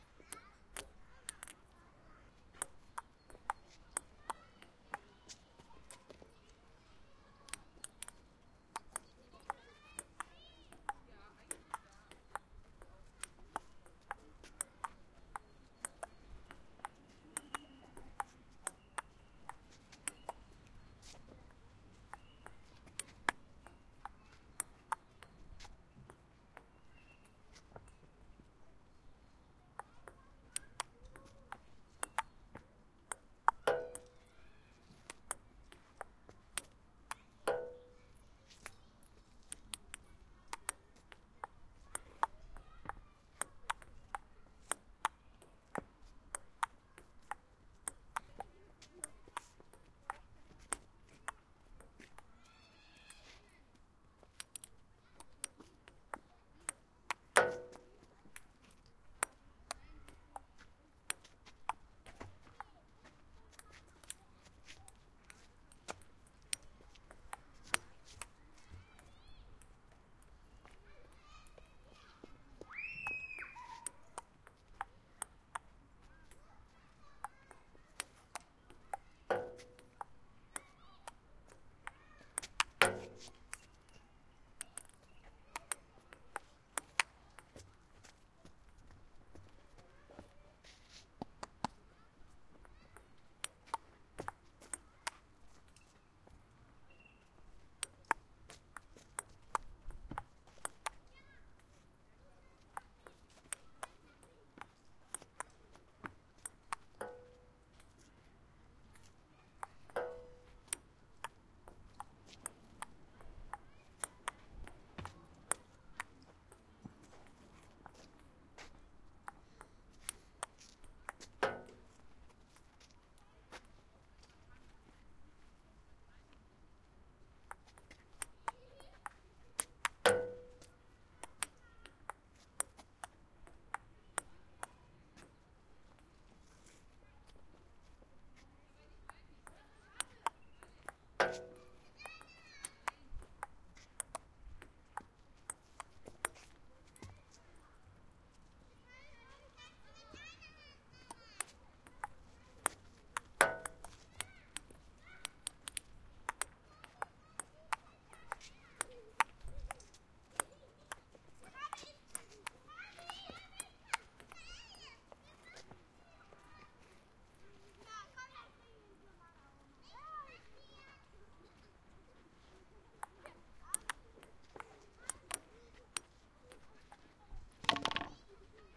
tabletennis outdoors
Playing tabletennis on a nice sunny day at a playground in Leipzig.
The table is pretty old and made out of metal and concrete. The net is out of metal. Sometimes the ball hits it with a "pong".
Recorded with a Zoom H2 in 360-mode. Edited the mics pointing to the table a liitle louder and used very little noise reduction to reduce the wind noises a bit.
Kids roaming around and the balls are flying! *sigh* I love those days.
outdoor, pingpong, sport, tabletennis, tischtennis